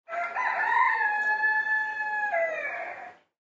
Fair poultry Barn chick rost various-007
Fair Poultry Barn Various chickens and rooters crowing and making noise.
Chickens, Roosters, Crow, Field-Recording, Morning